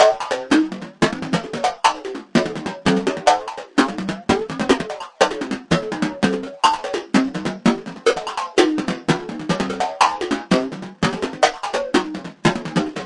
crazy electro synth
freaky synth percussion loop
loop, percussion, harsh, electro, freaky, industrial, synthesizer, weird